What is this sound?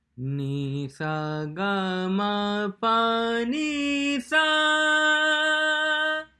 Male singing Indian Sargam
Indian, Sargam, singing